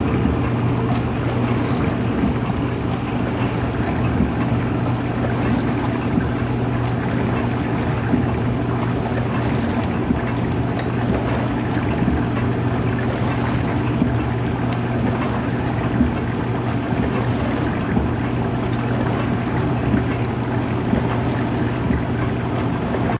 Washing Machine Close Perspective
A washing machine being recorded at a close distance
Clank, Clean, Close, Dishes, Household, Kitchen, Machine, Perspective, Rumble, Soap, Spray, Washing, Water